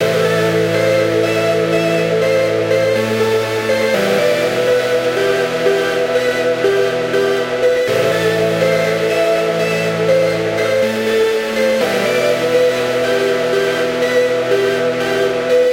an noizy electro organ pad loop.
very transistory.
electric, organ, noizy